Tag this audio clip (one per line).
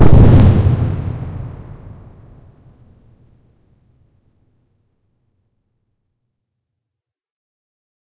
bomb
blast
synthetic
good